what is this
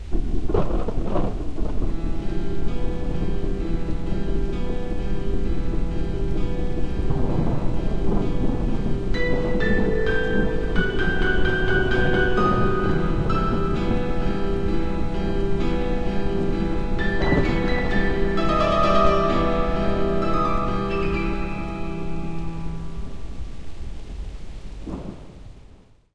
Halloween Piano {Updated}
An updated version of the Halloween piano sting I made. Have fun:)
creepy, drama, ghostly, Halloween, horror, phantom, Piano, sad, scary, spooky, thunder